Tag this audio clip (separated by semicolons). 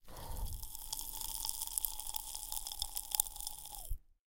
candy; crackle; eat; eating; human; male; mouth; pop; Poprocks; rocks; sizzle; sound; sweets